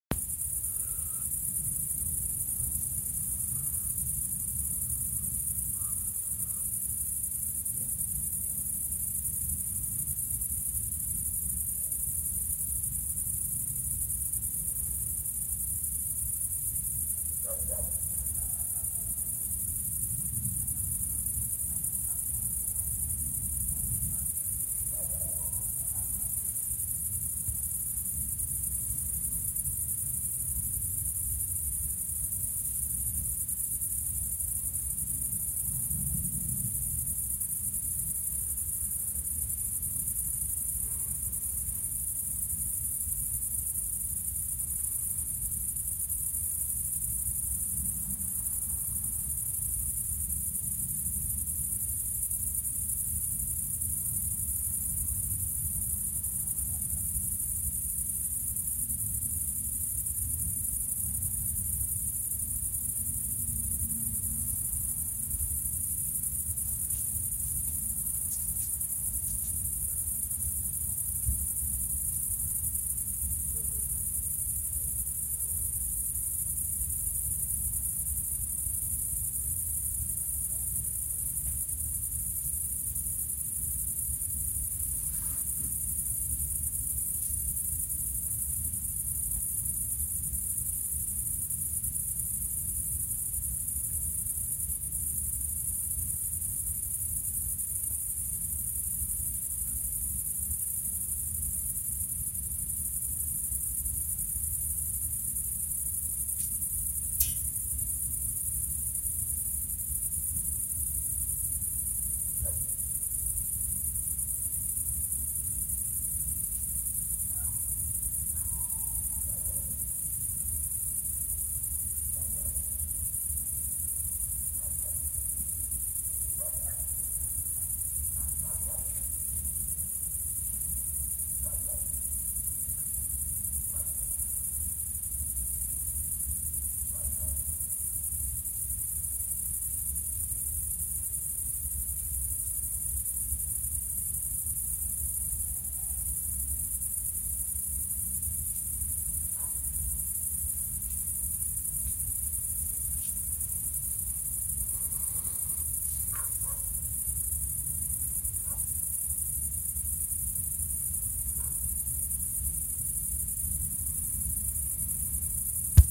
night ambient
village at night
insects, summer